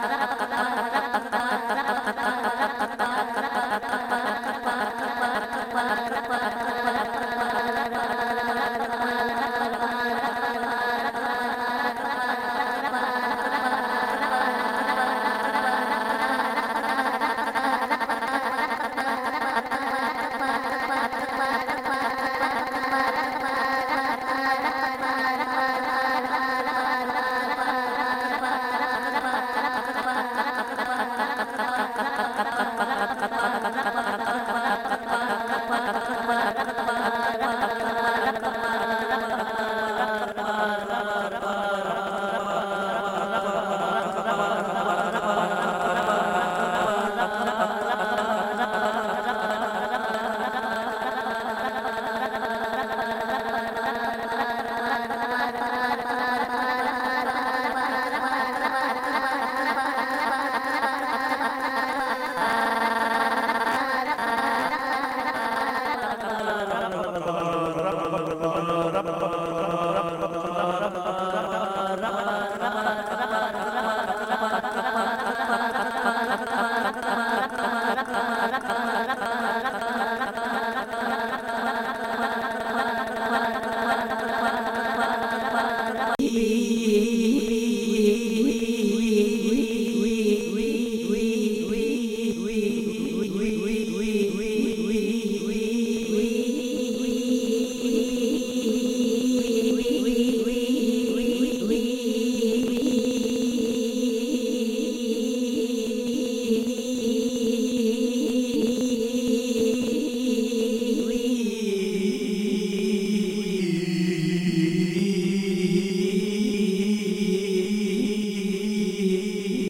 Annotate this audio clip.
a elena sennheiser vocal processed with various floss audio tools